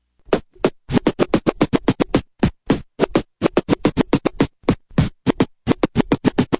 beat stab3
Juggling a kick with a vinyl record.
beat, kick, dj, hop, turntable, hip